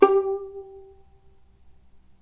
violin pizz vib G3
violin pizzicato vibrato
pizzicato, violin, vibrato